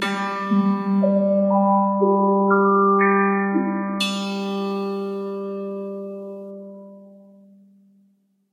piano sequence
piano processed samples remix
transformation, piano, pluck